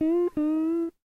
Tape Slide Guitar 14
Lo-fi tape samples at your disposal.
Jordan-Mills, slide, tape, lo-fi, guitar, lofi, collab-2, vintage, mojomills